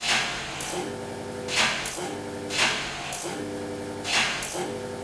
Another machine loop.
factory, industrial, loop, machine, machinery, mechanical, noise, robot, robotic